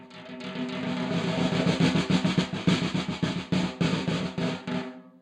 Snare roll, completely unprocessed. Recorded with one dynamic mike over the snare, using 5A sticks.